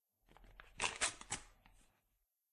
Fast ripping sound of some paper.